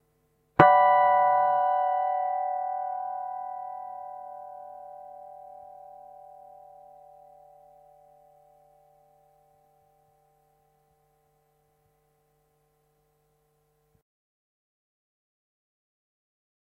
Finger plugged.
Gear used:
Washburn WR-150 Scalloped EMG-89 Bridge
dr-05, electric, emg-89, emg-s, guitar, harmonics, scalloped, tascam, washburn, wr150